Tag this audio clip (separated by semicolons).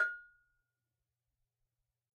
bell; double-bell; ghana; gogo; metalic; percussion